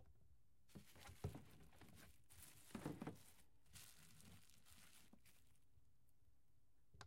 Throwing away trash in can
trash, can, Throwing, away